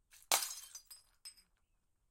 Bottle Smash FF150
1 light quick beer bottle smash, hammer, liquid-filled
Bottle-Breaking, Bottle-smash, light